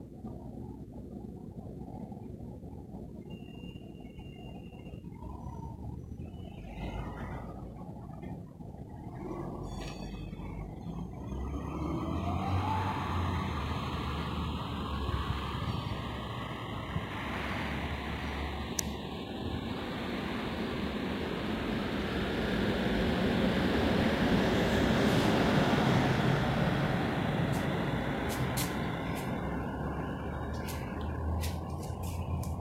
street distant tram noise
Afternoon traffic ambiance hum on the street - field-recording taken from the yard/balcony in front of my door, in the distance you can hear the warning buzz from the tram and later its starting off from the tram stop nearby. Tram continues then to go from left to right channel, accompanied by some random people noise from the yard.
Brno city center, Czech Republic, Central Europe. Recorded by Huawei Prime phone, which unfortunately makes some noise filtering itself.
Recording date: 11.04.2019
tramcar, tram-stop, street, buzzer, distant, city, buzz, distance, center, czech-republic, european, hum, traffic, tramway, ripple, brno, ambiance, warning, noise, sound, czechia, hoot, afternoon, field-recording, streetcar, ambience, europe, tram, alert, city-center